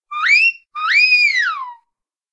A stereo wolf whistle. Rode NT4 > FEL battery pre-amp > Zoom H2 line-in.
wolf-whistling, approval, foxy, stereo, hot, widwiw, sexy, wid-wiw, wolfwhistle, fit, whistle, whistling, wolf-whistle, xy